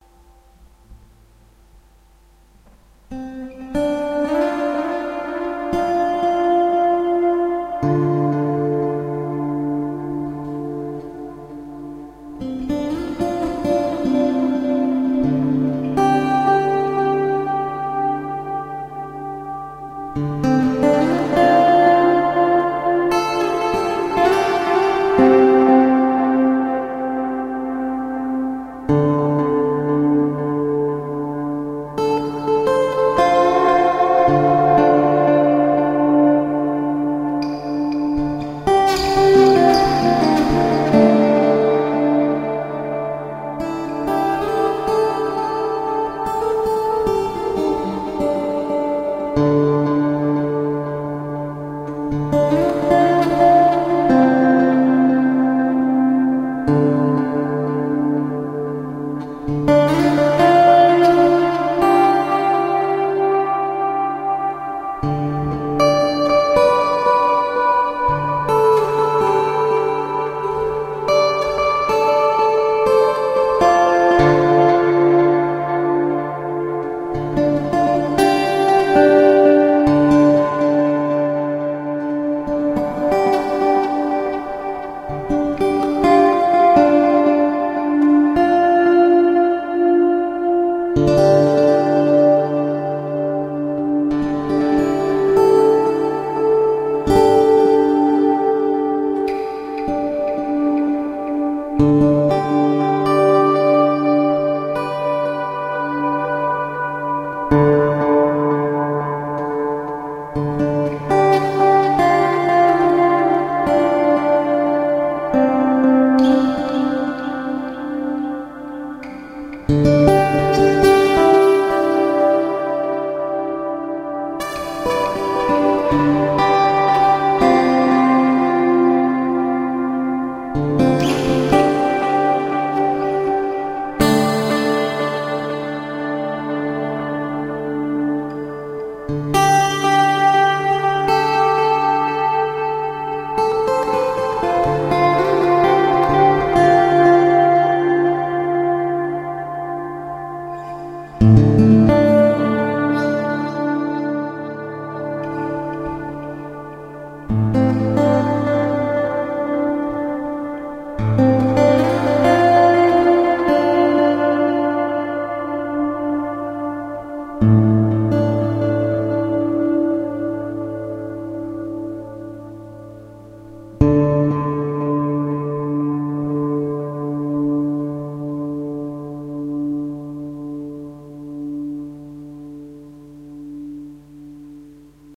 Atmospheric guitar solo
Atmospheric acoustic guitar solo with reverb and delay effects.
atmospheric, solo, experimental, improvised, acoustic, guitar, music